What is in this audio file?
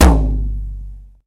pwdrum biggerdruminsidebrown
Mono samples of a small children's drum set recorded with 3 different "sticks". One is plastic with a blue rubber tip that came with a drum machine. One is a heavy green plastic stick from a previous toy drum. The third stick used is a thinner brown plastic one.
Drum consists of a bass drum (recorded using the kick pedal and the other 3 sticks), 2 different sized "tom" drums, and a cheesy cymbal that uses rattling rivets for an interesting effect.
Recorded with Olympus digital unit, inside and outside of each drum with various but minimal EQ and volume processing to make them usable. File names indicate the drum and stick used in each sample.